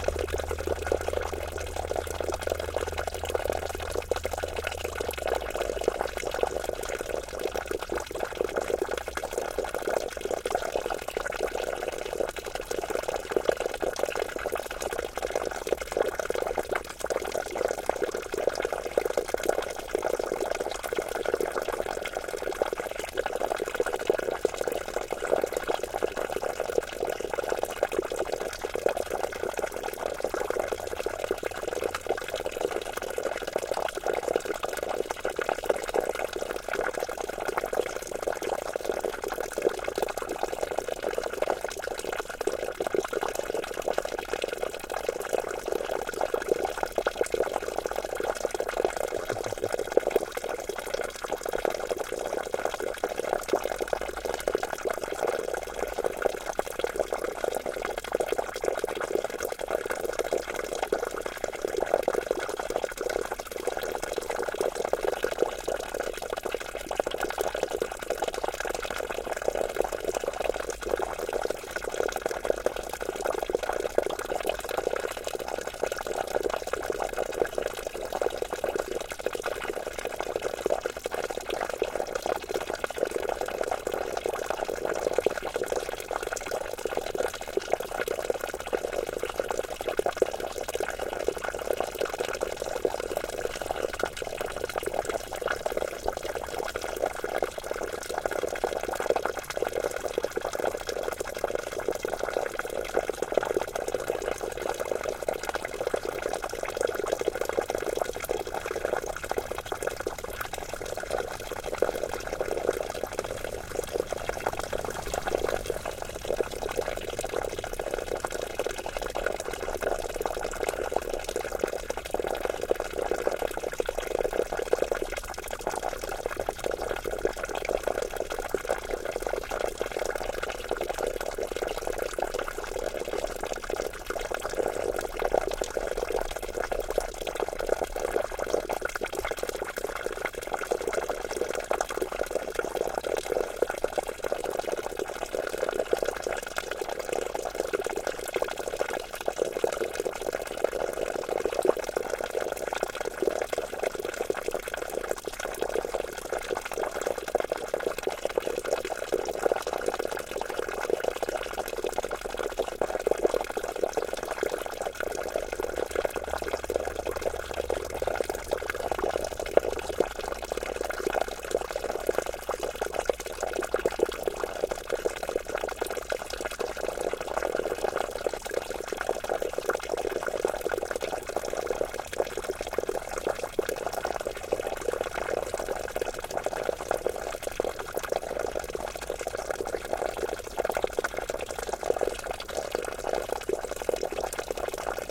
noise of a small stream, recorded near San Martín de Castañeda (Zamora, NW Spain) using a pair of Primo EM172 capsules, FEL Microphone Amplifier BMA2, PCM-M10 recorder